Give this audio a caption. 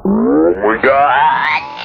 Processed sound from phone sample pack edited with Cool Edit 96. Stretch effect applied for pitch shift. Then gliding pitch shift added.